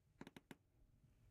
Wood Creak 10
Wooden Creaking
Wooden Chair Creak
Creak, Wooden, Chair, floor, Creaking